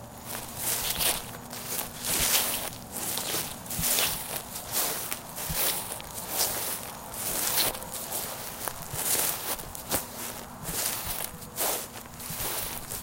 Short segment of a zombie walking on grass.
folie, steps, zombie